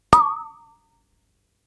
samples in this pack are "percussion"-hits i recorded in a free session, recorded with the built-in mic of the powerbook
boing, bottle, metal, noise, ping, pong